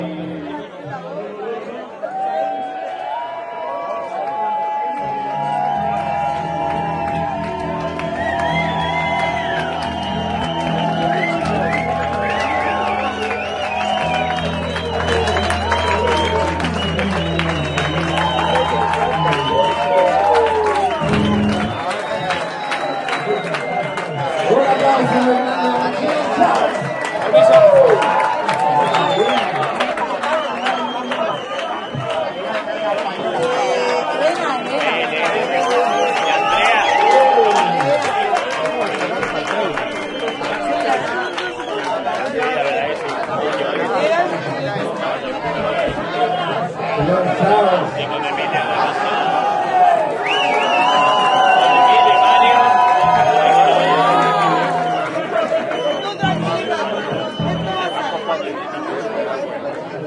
small club ambiance. Voices speak Spanish